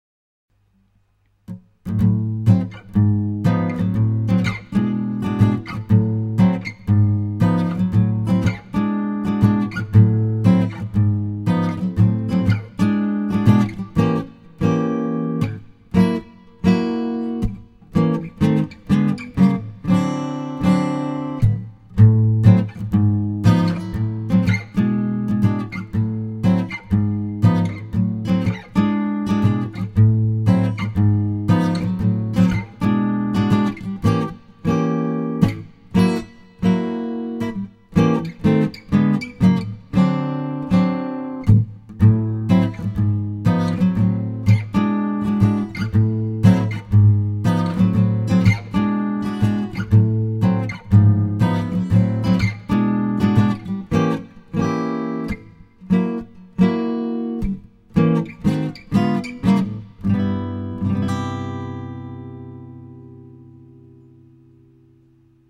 This is short swing song, plaed by acoustic guitar, record in two track, through mic: AKG perception 100 and linе (Preamp Fishman classic)-> presonus Inspire 1394.
key in Am.
bpm = 120.
music, swing, notes, strings, chord, clean, chords, minor, song, guitar, acoustic, open-chords, sample, acoustic-guitar